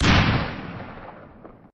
Bomb - Small
It's a short small bomb explosion made in FL studio 8 from a "tack" sound by modifying it.
explosion, small